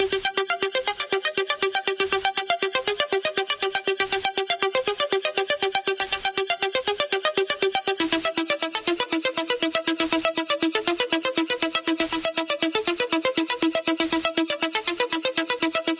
80s Movie Arp 001 (C - 120)
80s Suspense Horror Movie Arp. Key: C - BPM: 120